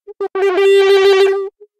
A funny warbling sound from a strange and flexible patch I created on my Nord Modular synth.
nord, weird, synthetic, strange, modular, digital, sound-design